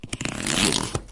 grattement sur un carton alveolé